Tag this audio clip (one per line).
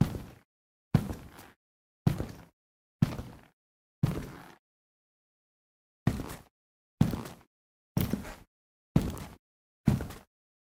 boot
boots
clean
dr05
feet
field
floor
foot
footstep
ground
interior
recording
run
tascam
tile
tiles
walk
walking